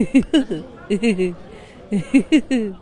AmCS JH ME25 hihuhuhuw
Sound collected at Amsterdam Central Station as part of the Genetic Choir's Loop-Copy-Mutate project
Amsterdam, Central-Station, Meaning